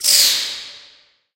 Metal iron magic spell cast
Mostly speed up and pitch shift.